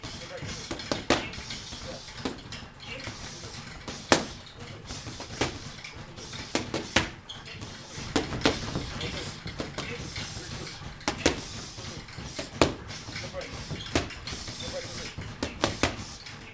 Workout gym, training, boxing
Mono recording of a boxing gym